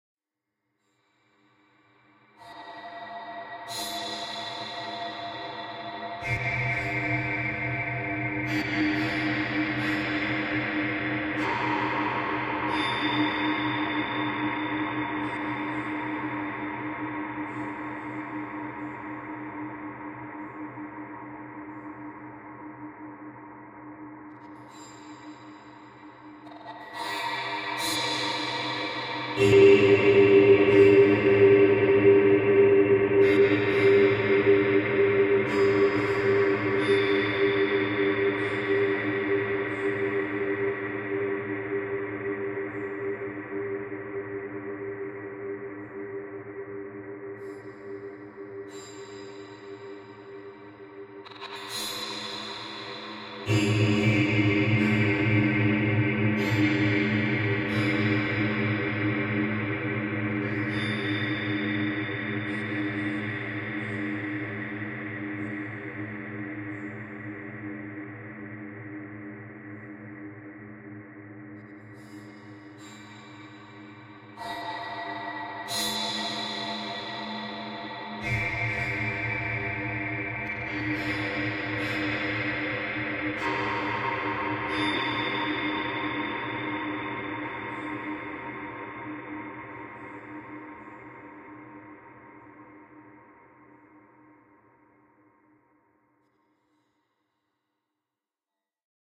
06 Ambience High Timbre
Made with Ableton Live and Max For Live.
This sample is part of the "New Spirit" sample pack. Slowly evolving spacey
high, ambience, dramatic, thrill, drama, soundtrack, tone, background-sound, terrifying, Sound-Effect, Tension, Ableton, atmosphere, dark, Delay, spooky, terror, Movie, film, ambient, creepy